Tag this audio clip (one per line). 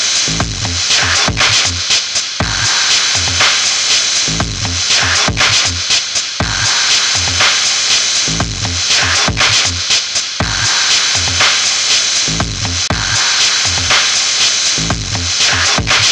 beats
effected
processed